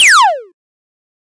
This is high piched sound of noise. Sounds similar to laser. Can use in allot of ways. Made in FL studios.
electric, future, sound-design, computer, science-fiction, machine, electronic, electronics, mechanical, fantasy, buzz, effect, noise, sci-fi, digital